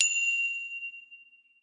Northeast Bling
drum, figure, kit, percussion